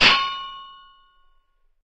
Chinese blade1
blade, China, Chinese, slash, sword